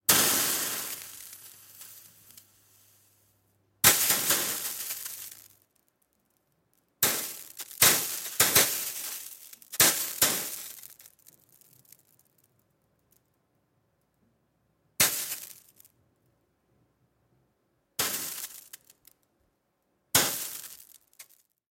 Water drops on a hot surface
Water drops on a hot ceramic cooktop.